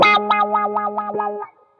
GTCC WH 12
guitar, wah, bpm100, fm, samples